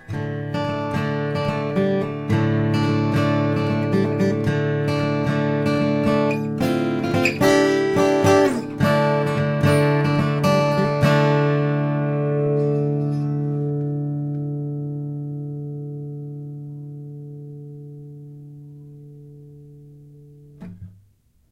a short coda played, with acoustic guitar (Rodent4>Fel mic booster>edirol_r1)